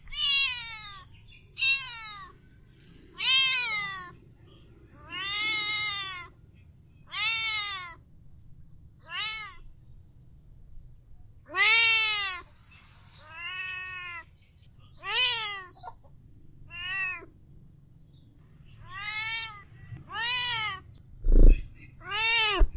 Mother cat. Use Zoom H1. 2013.02